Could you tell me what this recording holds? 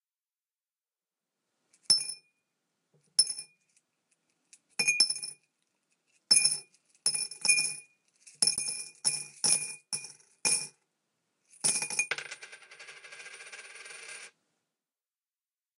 change in jar
Change being dropped into a glass jar.
falling-change, change-jar, change-dropped, change, dropped-change, money, jar